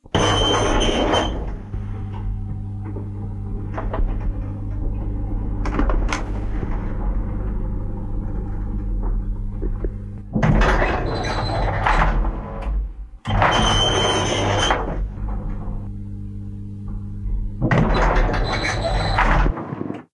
old lift, which would need some oil...
ambient, house, lift